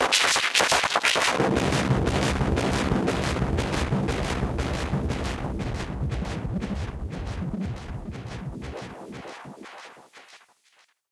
Analog Sandstorm was made with a Triton, and 2 Electrix effect processors, the MoFX and the Filter Factory. Recorded in Live, through UAD plugins, the Fairchild emulator,the 88RS Channel Strip, and the 1073 EQ. I then edited up the results and layed these in Kontakt to run into Gating FX.
Analog-Filter, Noise, Distortion, Electrix, UAD